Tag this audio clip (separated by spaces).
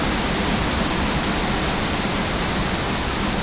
stationary wind noise